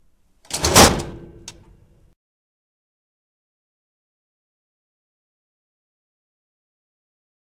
FX kill switch single record
multiple layer of switches in an old theatre